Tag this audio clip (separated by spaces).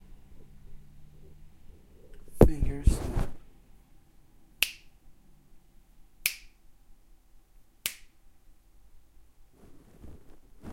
finger snap snapping